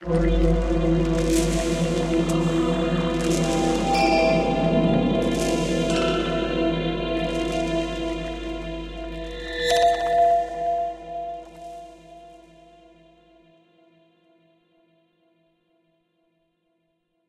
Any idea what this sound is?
Tweaked percussion and cymbal sounds combined with synths and effects.
Ambience, Atmo, Atmospheric, Bells, Deep, Percussion, Sound-Effect, Wide